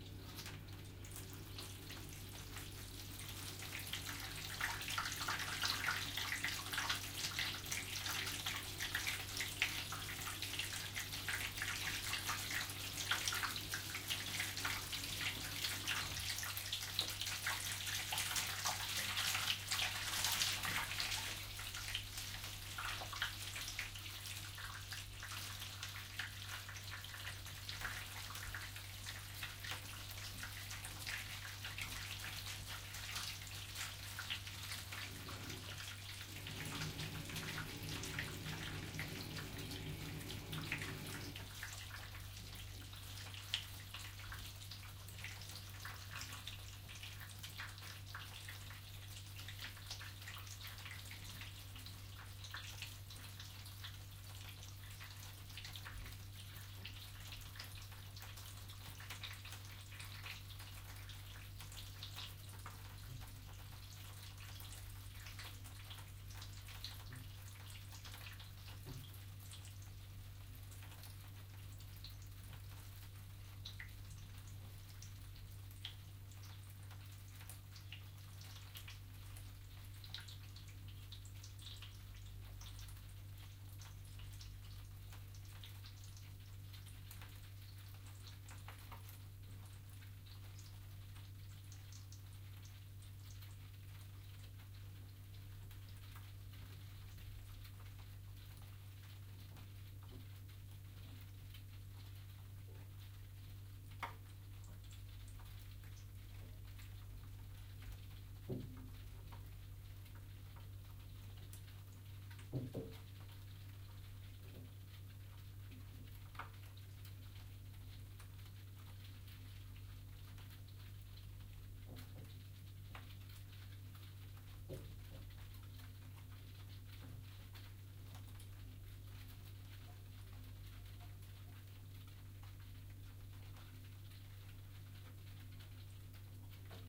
Bathroom tubes gurgling